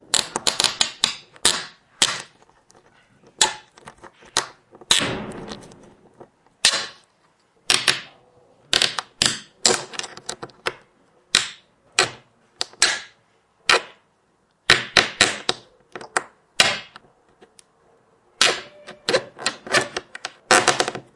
Friction caps of mineral water
scrape, creak, PET-bottle